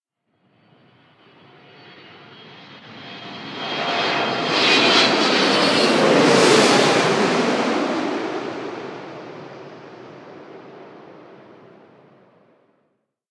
Plane Landing 14 MONO
Recorded at Birmingham Airport on a very windy day.